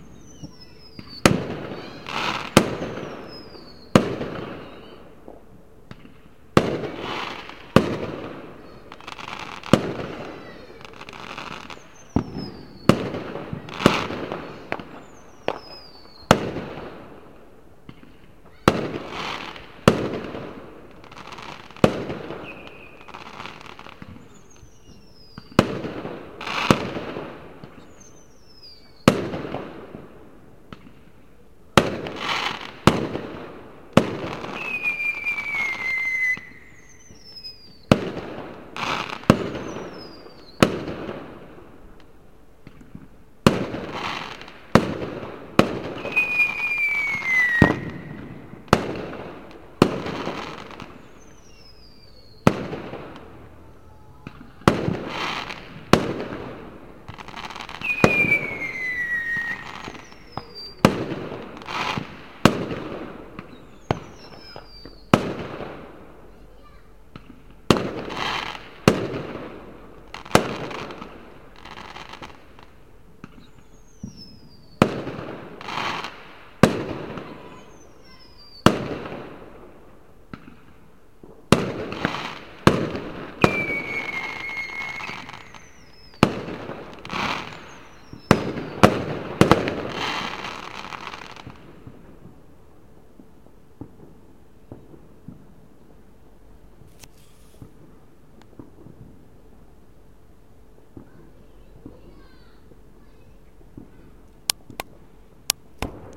Firework Display 2 - Re-upload!
blasts, explosions, explosives, fireworks, pyrotechnics, rockets